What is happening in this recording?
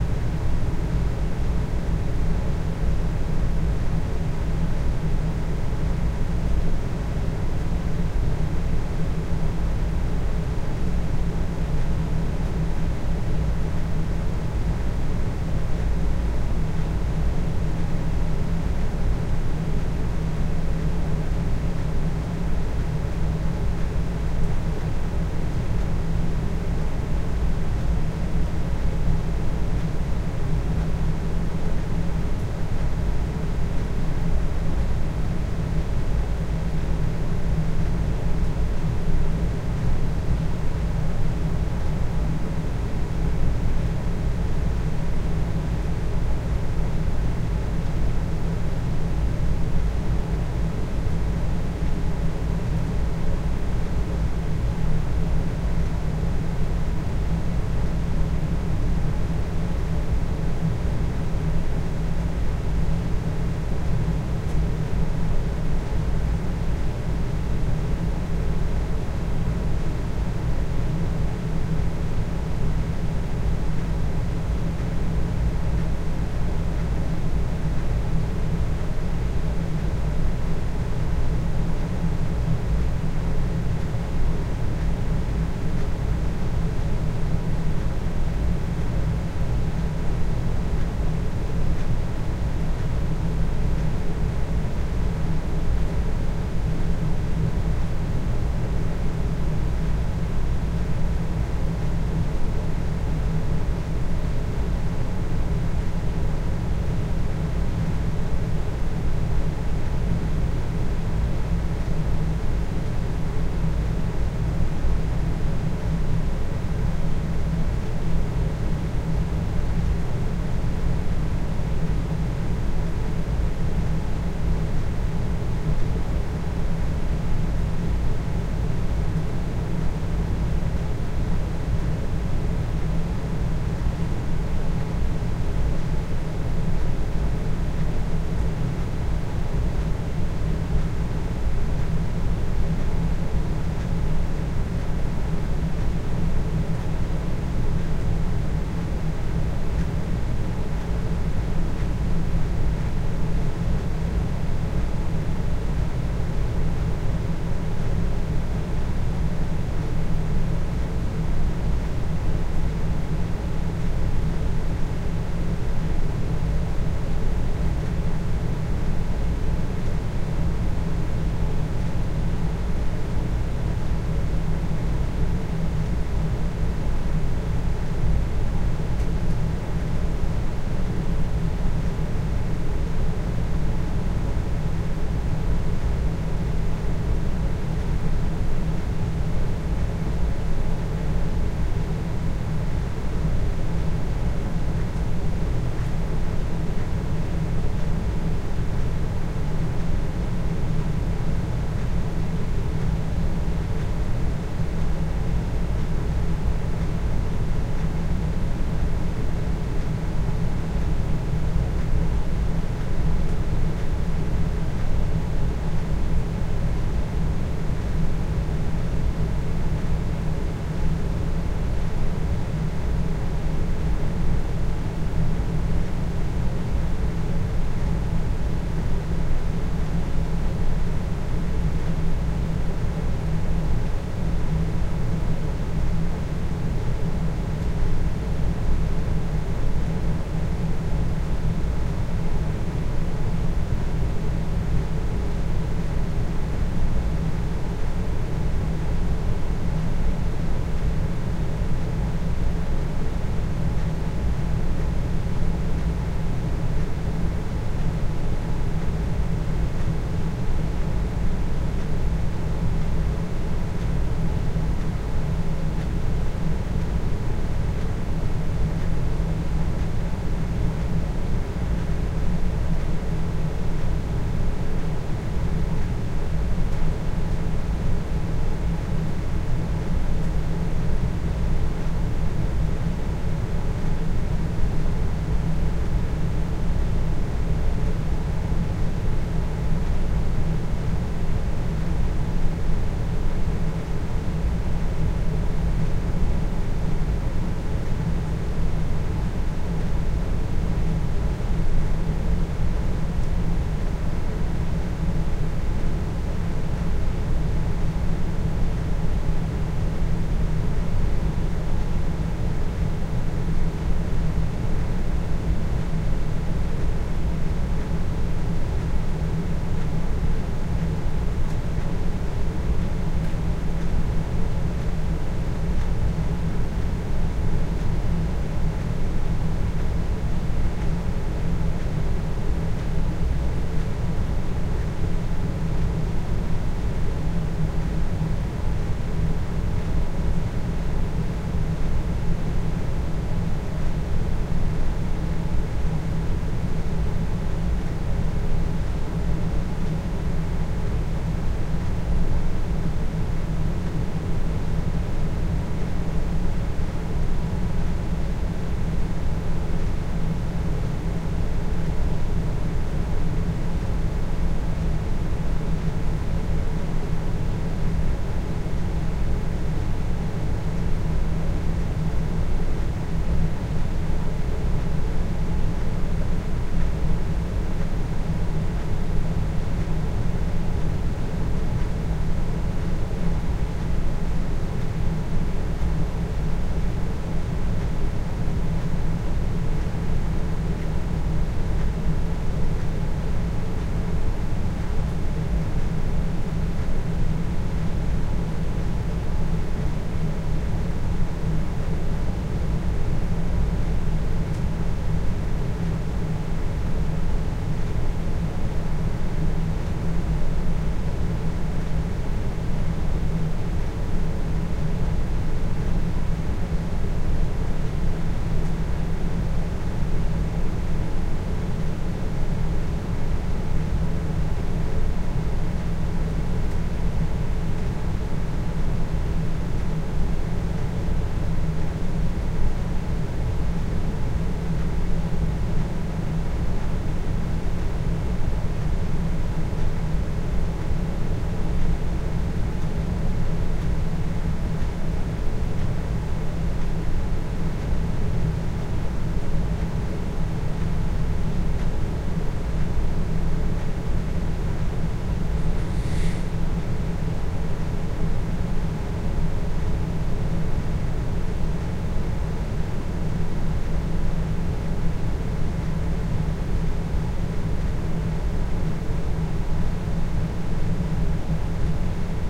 soundscape: indoor01
This is a recording of an indoor soundscape using the primo EM172 electret mic elements through a Zoom H4N handheld recorder in the plug in power mic jack.